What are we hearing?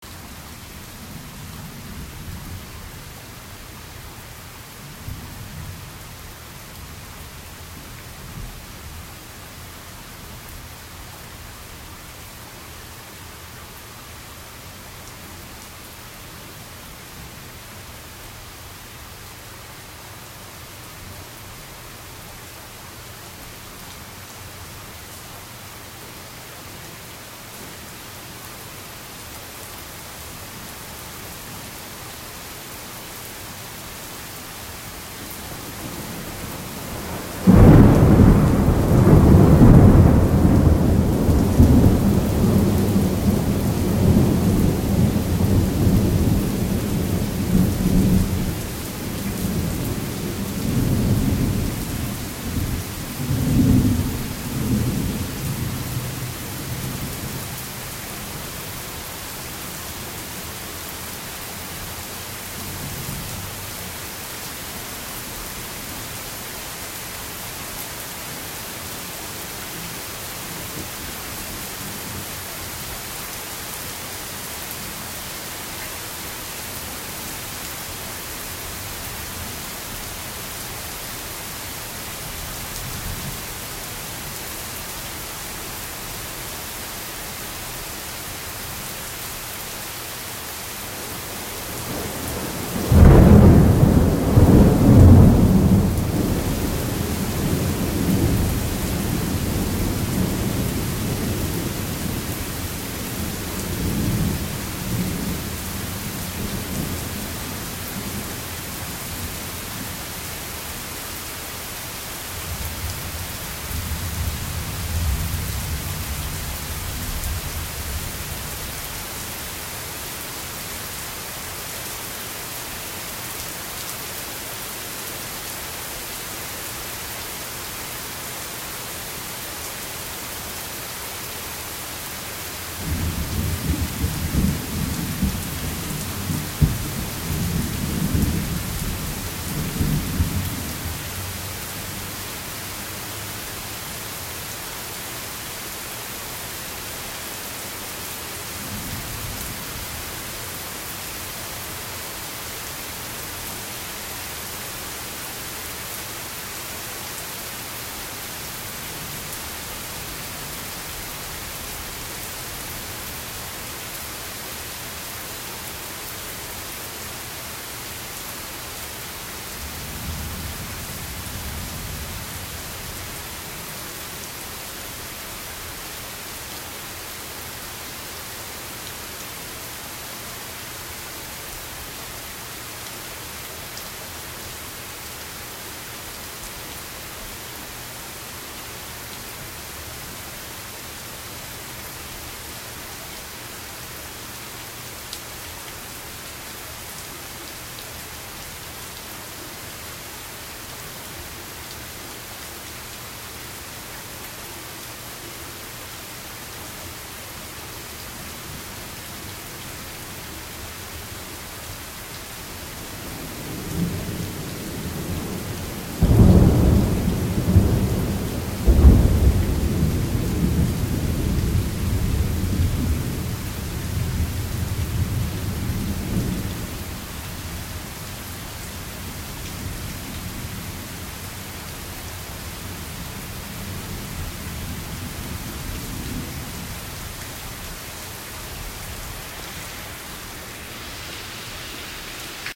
A long clip of a strong thunderstorm with heavy rain and loud thunder. Recorded with an iPhone 8.
rain, storm, weather, thunderstorm, lightning, thunder